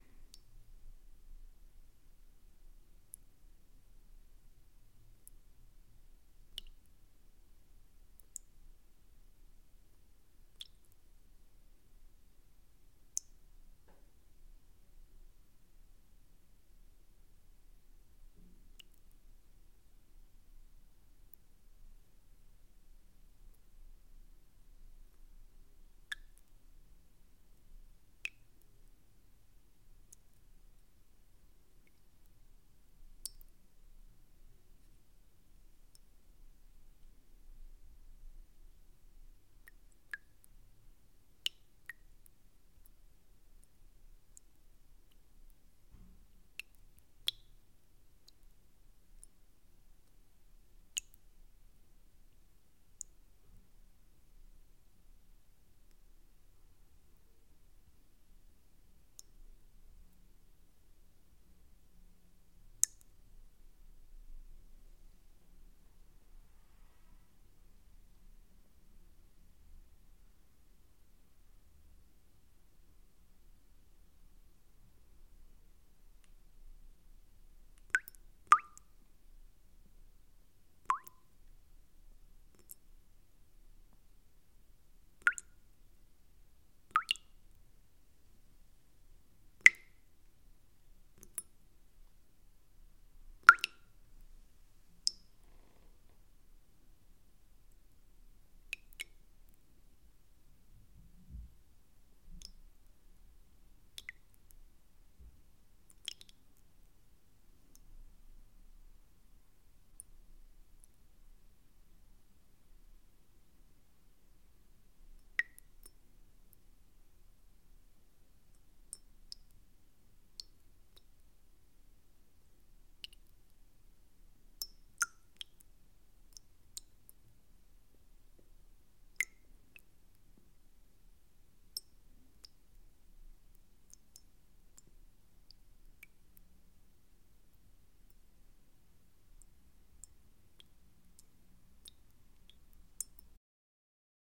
Water drops 3
Some water drops in a bowl, made manually with a spoon (and love).
Recorded on a Zoom H4N and a large membrane cardioid mic.